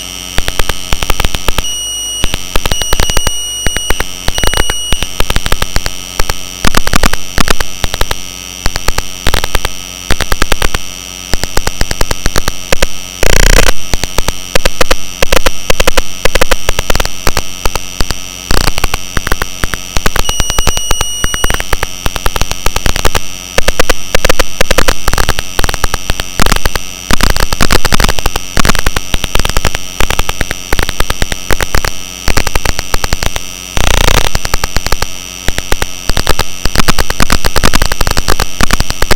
Keyboard operating sounds
When you sit hammering on your keyboard, be glad you don't 'hear' all that chat between keyboard and computer. In reality, it's about very fast electrical signals, which you don't hear, but can study on a scope or freq analyzer. I have transformed the signals so you can 'hear' what the keyboard says to computer.
computer, signals, keyboard, electric